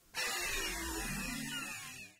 I'm using for a large machine powering down. Will work for vehicles, robots, industrial machines etc.